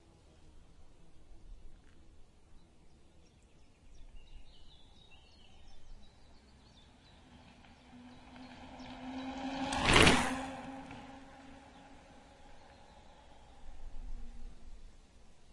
Bike passing-by 2
MTB bike on a forest road passing-by fast. Some birds in background audible.
Recorded with 2x WM-61A capsules plugged into iRiver IFP-790.
Little noise reduction in Audigy.
downhill, passing-by, forest, mtb, road, bike